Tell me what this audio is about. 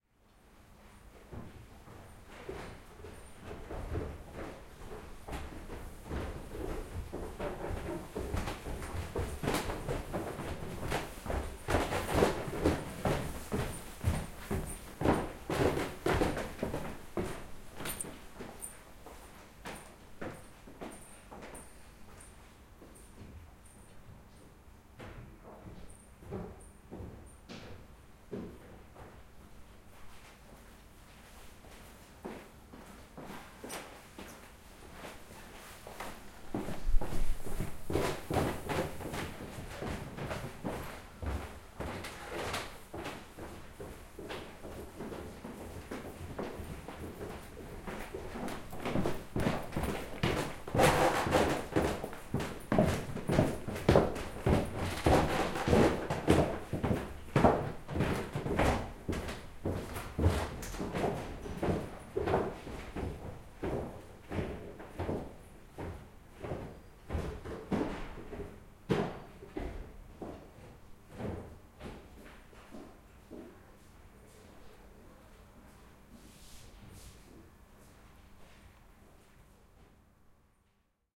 Wood stairs - UCL Main Library
Field recording of people walking up and down the wood stairs in the Main Library. Recorded 4 December, 2012 in stereo on Zoom H4N with windscreen.
academia, bus, bustle, feet, field-recording, Gower-Street, London, noise, traffic, UCL, University-College-London